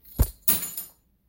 Dropping a small metal chain.
clank, clink, metal, bink, drop, bonk, falling-metal, crash